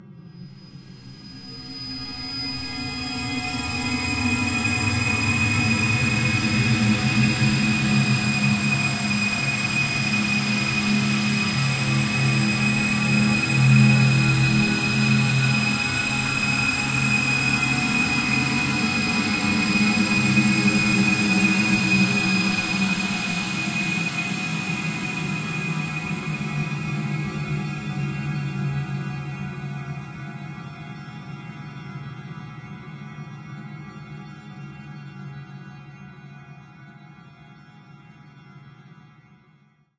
LAYERS 022 - Giant Dust Particle Drone-112
LAYERS 022 - Giant Dust Particle Drone is an extensive multisample packages where all the keys of the keyboard were sampled totalling 128 samples. Also normalisation was applied to each sample. I layered the following: a soundscape created with NI Absynth 5, a high frequency resonance from NI FM8, another self recorded soundscape edited within NI Kontakt and a synth sound from Camel Alchemy. All sounds were self created and convoluted in several ways (separately and mixed down). The result is a dusty cinematic soundscape from outer space. Very suitable for soundtracks or installations.
soundscape, dusty, space, cinimatic, multisample, pad